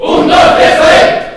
shout 1,2,3, sabadell2
Vocal energy sound saying the name of the club recorded before the match with the whole basketball team in the locker room.
shout, team, sabadell